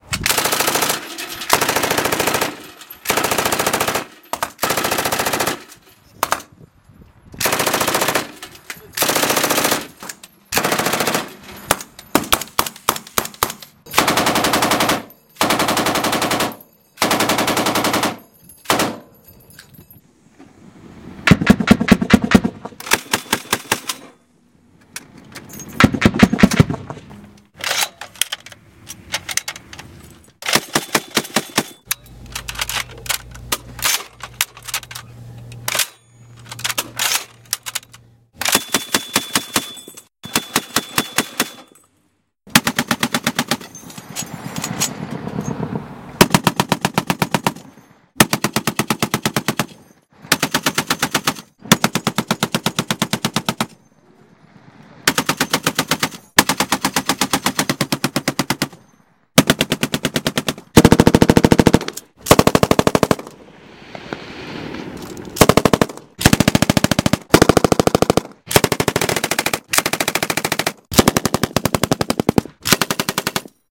Gunfire of different machine guns, rifles, grenade launchers and arms at different perspectives.
battle, gunfire, warfare, weapons, machine, fire, weaponized, ammunition, war, shot, shooting, weapon, gunman, military, gun, mg, ammo, gunnery, firefight, gunmen